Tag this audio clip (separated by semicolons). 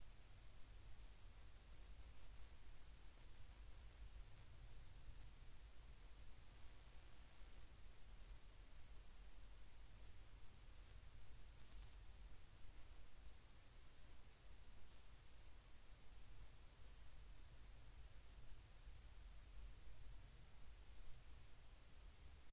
ruido; de; piso